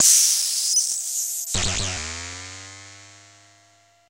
short circuit08
Some noise with glitches and a weird sound at the end. Created on the Nord Modular synth with FM and sync feedback.